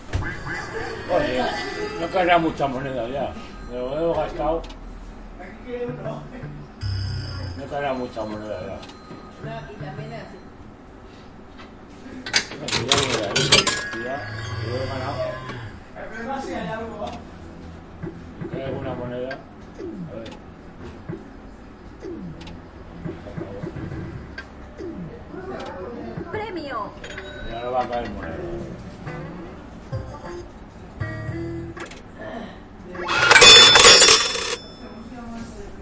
interior sala juegos
Typical sounds of gamerooms, gamers´s voices and falling coins